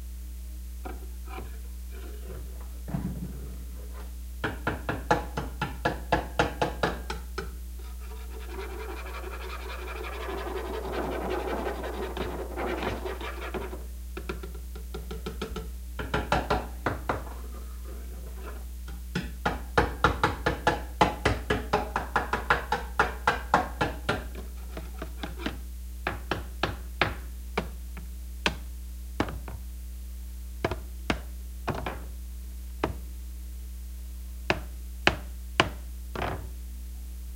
abyss ambience2
Me Scratching A Knife! But It’s In Kid’s Bedroom I Grabbed The Knife In The Kitchen
Abyss, Ambience, Dark, Darkness, Horror, Outer-Space, Pads, Scary